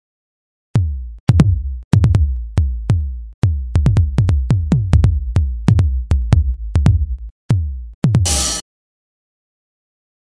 a small drum solo
electric-drum, drum-solo, electric, techno, drum